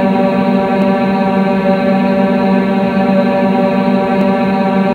Created using spectral freezing max patch. Some may have pops and clicks or audible looping but shouldn't be hard to fix.
Perpetual, Soundscape, Everlasting, Sound-Effect, Atmospheric, Freeze, Background, Still